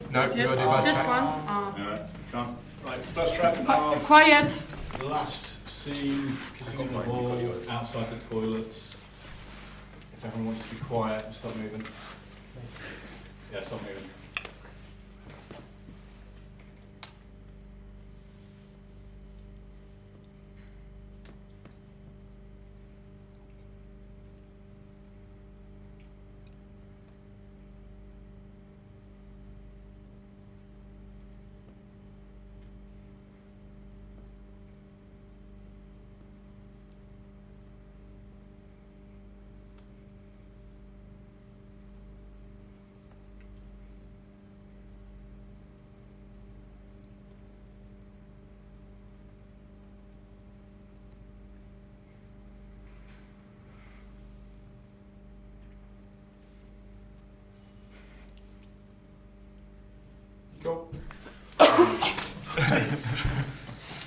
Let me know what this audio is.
Buzz TrackTCorridor 1
Ok so most of these tracks in this pack have either been recorded whilst I have been on set so the names are reflective of the time and character location of the film it was originally recorded for.
Recorded with a Sennheiser MKH 416T, SQN 4s Series IVe Mixer and Tascam DR-680 PCM Recorder.
Corridor, indoors, room-tone, roomtone